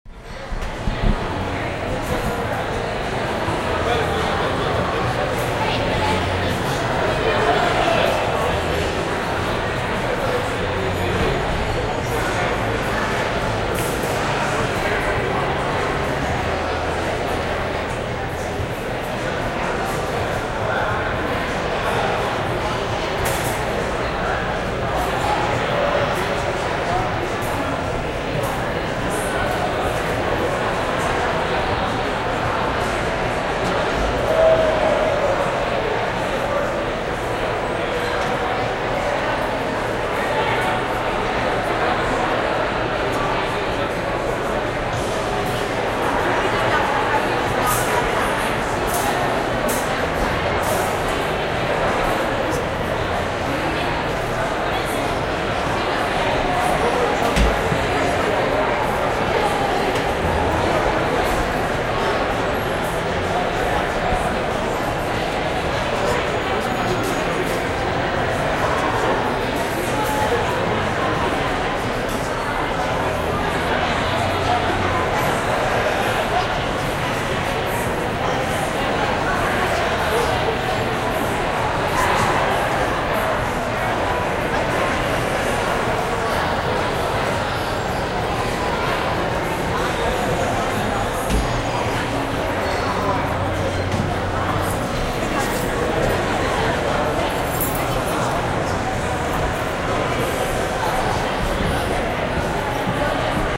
jf Resonant Space
A large group of people, a crowd, interacting in a resonant space.